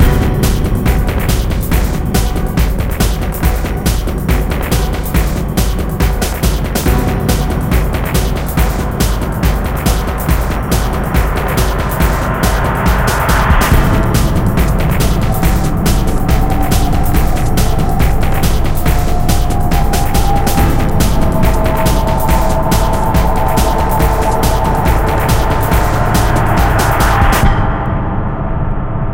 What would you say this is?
Action music loop with dark ambient drones
An old unfinished loop I made in FL Studio (version 6 at the time I believe). It contains some dark ambient drones in the second half that adds a bit of mysteriousness to it.
action, ambient, chase, dark, drone, ethereal, loop, music, mysterious, tension